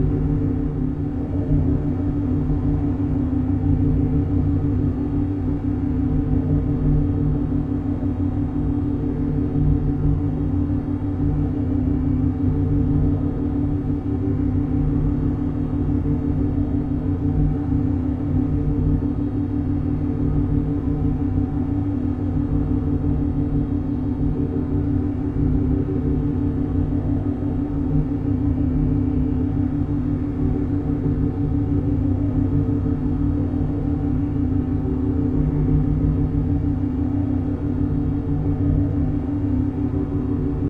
Seemless loop with brainwave synchronization to around 5 Hz (theta wave) for relaxation. Like the EngineRoomSteady sound but created with much higher tones. Despite their discordance, the overall effect can be soothing if kept at a low level.